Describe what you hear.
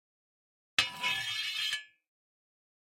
Sliding Metal 11
blacksmith, clang, iron, metal, metallic, rod, shield, shiny, slide, steel